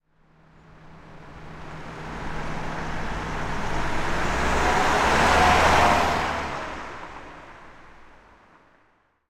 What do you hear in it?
RFX Panned Left to Right Car
The sound of a car recorded in movement. Sound captured from left to right.
Mic Production
car, driving, engine, road